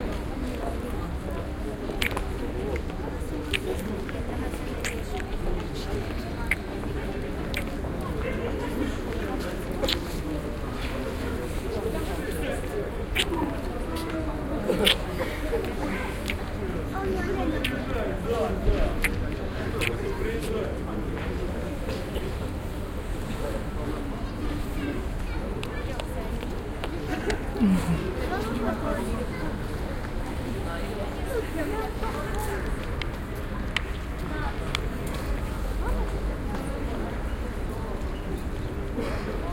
19-train-station-donetsk-kissing-the-recorder
Waiting in the train station the recorder himself is surprised by a nice girl stopping to kiss him. You can hear the slightly disgusting sound of kisses up close and personal.